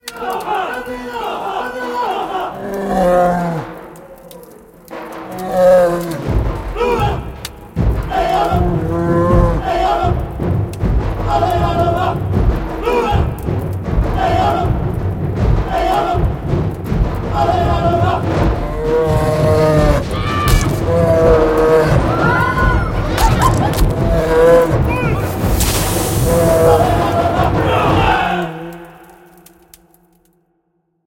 A tribe performs a bear hunting ritual.